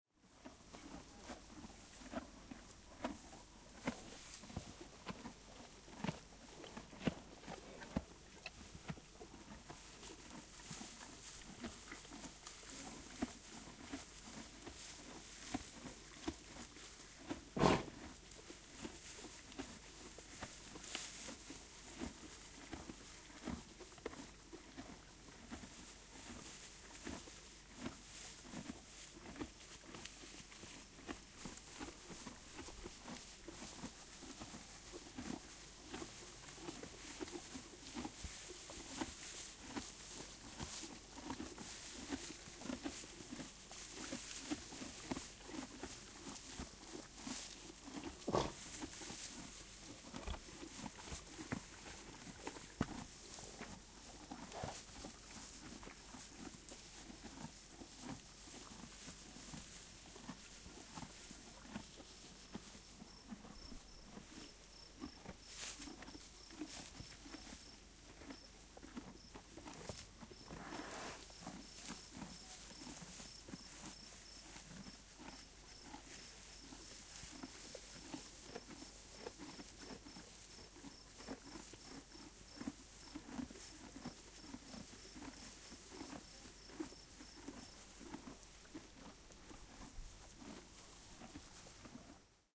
Donkeys eating
Sound of three donkeys, the breed: catalan donkeys. They are eating in an open-air space at night.
Animals, Bioscience, Campus-Gutenberg, Donkeys